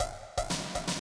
short-ringmod-drums
ring modulated drums